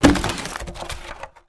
Barrel Break 1
Breaking a single wooden barrel.